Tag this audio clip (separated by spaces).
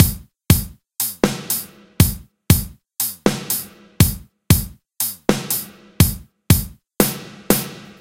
04 04-04 4 4-4 drum full kit pattern